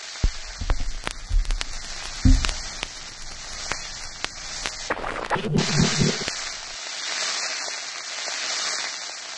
background is a recording of the motor of a turntable pitched down;
foreground is a field recording of a fireplace; processed with Adobe
Audition